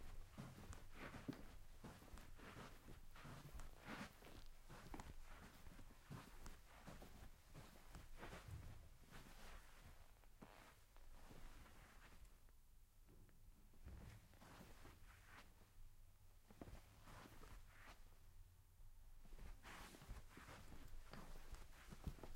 walking on carpet indoors